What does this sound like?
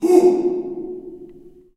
Male yells "Üh!"
Male screaming in a reverberant hall.
Recorded with:
Zoom H4n
low, male, scream, uh, vocal, yell